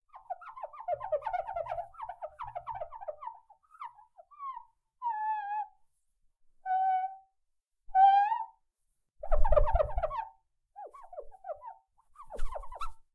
window finger rub
Rubbing a window with my own finger.